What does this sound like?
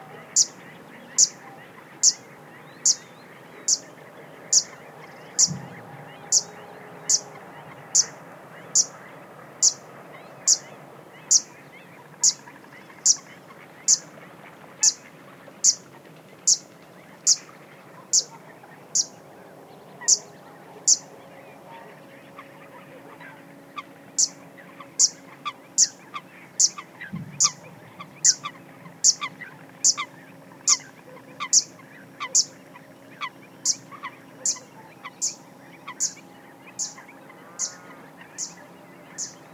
bird chirps + other bird calls (Coot) and noise of a machine in the distance. ME66 + MKH30 mics to Shure FP24 and Iriver H120, M/S decoded